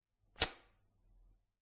Powerfully Rip Paper
Ripping sound of some paper.